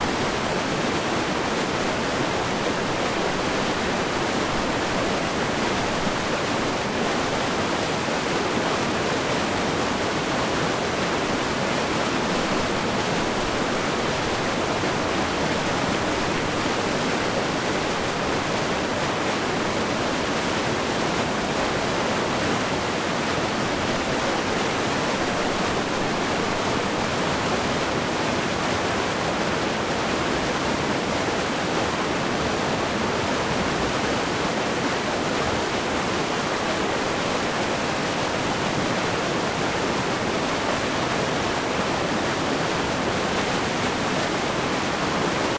weir CU1
mono recording close up of water thundering down a weir.
From the base of the weir - recorded with a sennheiser ME66 onto a Tascam DR40
field-recording, weir